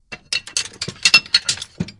recordings from my garage.